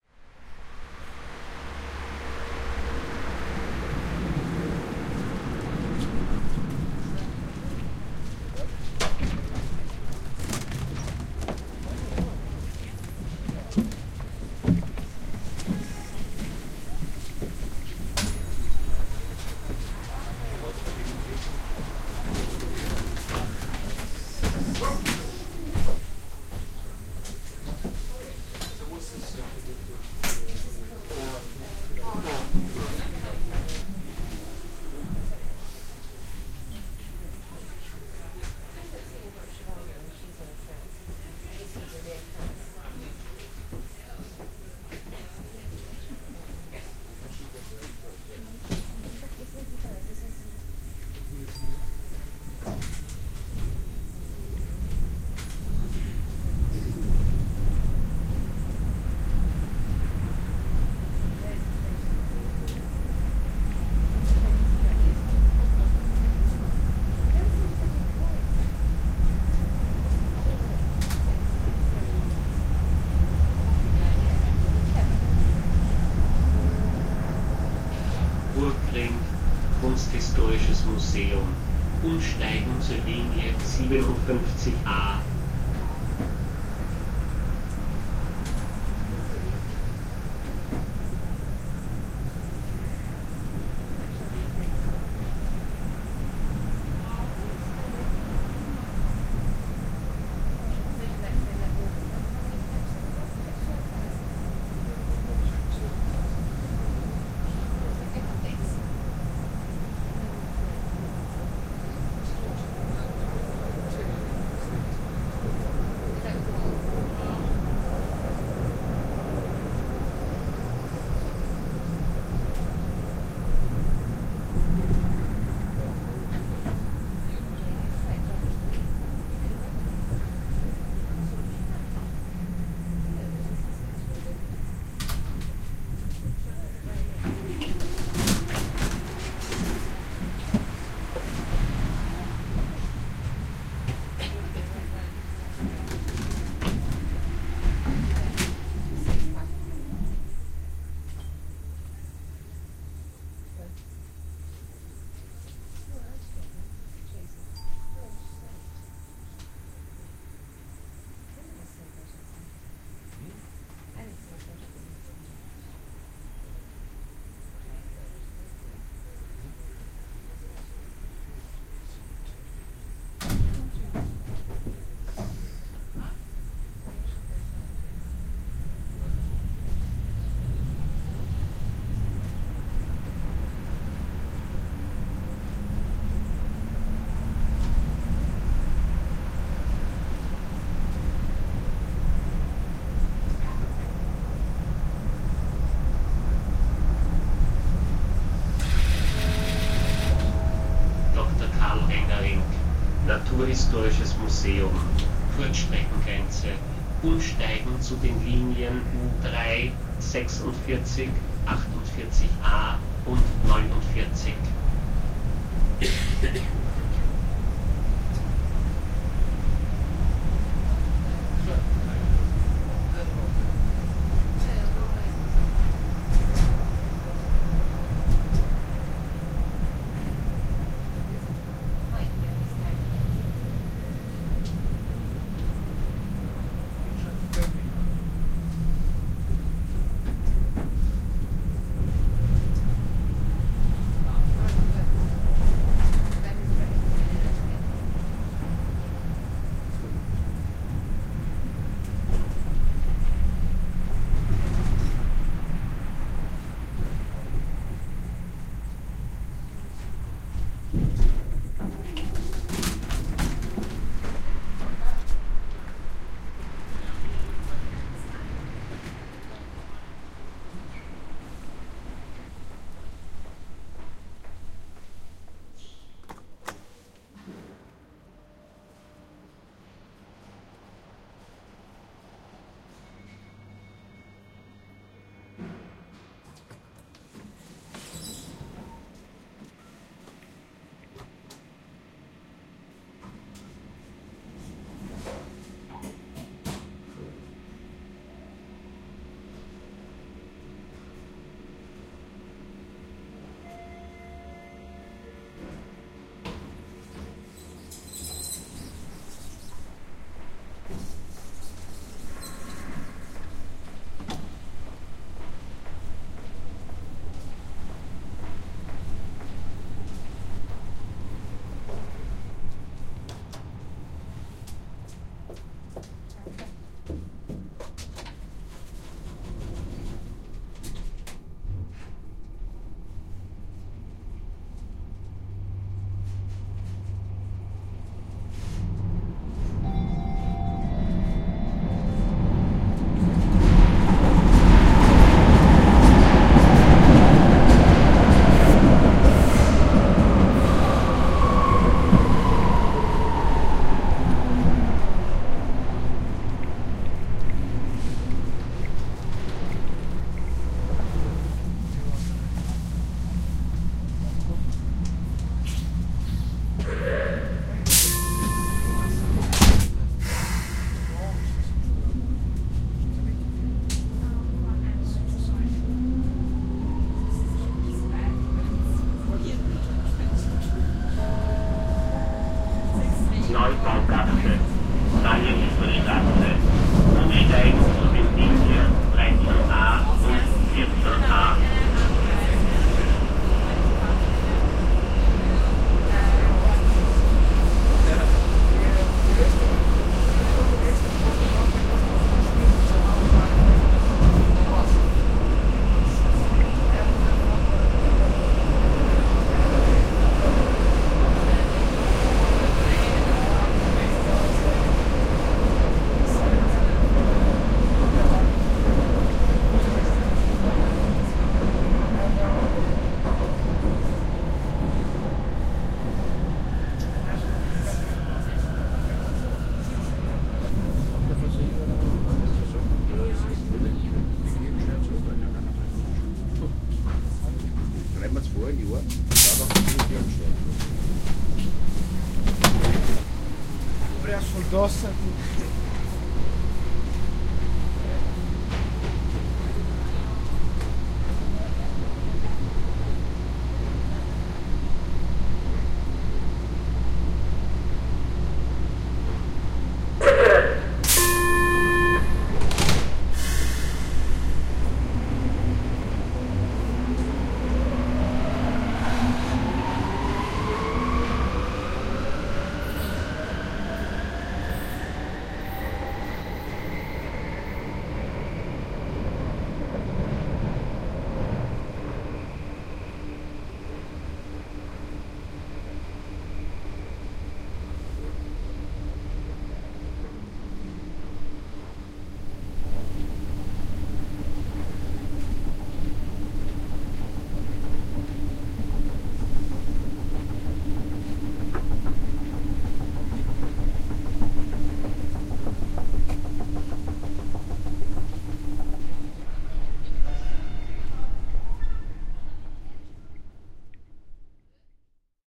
Tramway and Subway (Metro) Ride in Vienna, Austria
Inside Tramway (along Ringstraße) and Subway (Metro) in Vienna, Austria. It starts on the Ringstraße street in front of the Vienna State Opera with an incoming tramway, doors opening, getting onboard. Inside there are people chatting, 2 stops with announcements, then leaving tramway at a metro station, outdoor traffic noise, elevator sounds, subway platform ambience (U3 Volkstheater), an incoming subway, and a short subway ride with announcement (U3 Neubaugasse), ambience, then leaving subway. XY recording with Tascam DAT 1998, Vienna, Austria
Inside, Voices, Tramway, Traffic, Vienna, Crowd, Announcements, Stereo, Cable-Car, Field-Recording